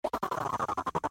Snarky Digital Duck Scatter (try looping this!)
Weird little looping, scratching sound effect. Responds well to a lot of different effects. Try looping it or feeding it into a wavetable / granular synth and see what you can come up with!
delay, duck, experimental, loop, quack, scratch, synth, tap